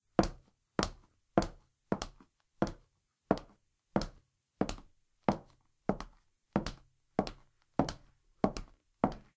Womens Shoes (2)
Slowly walking female high heeled shoes on a hard surface. Might be useful to split up for foley sounds or animation.
clean, female, footstep, heels, high-heels, shoe, women